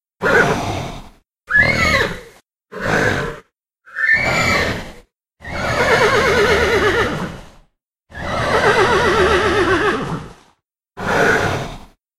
Centaur horse-human creature sounds based on horse sounds. It doesn't make sense to me that it would sound like this but it does make sense to me that people associate it well.
Mixed in audacity with the addition of "change speed", "change pitch" and "paulstretch" effects.
This is required by the license.
breath, breathing, centaur, creature, fantasy, greek-mythology, horse, mythological, mythology, neigh, snort, voice